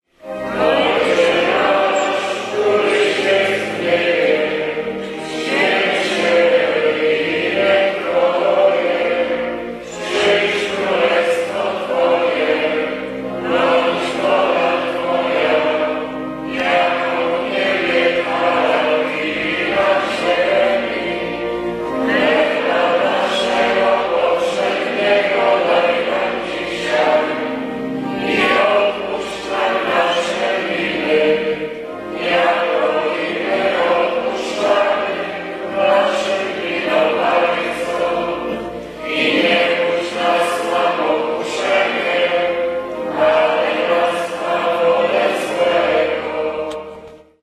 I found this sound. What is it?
03.06.2010: the Corpus Christi mass and procession in Wilda - one of the smallest district of the city of Poznan in Poland. The mass was in Maryi Krolowej (Mary the Queen) Church near of Wilda Market. The procession was passing through Wierzbiecice, Zupanskiego, Górna Wilda streets. I was there because of my friend Paul who come from UK and he is amazingly interested in local versions of living in Poznan.
more on: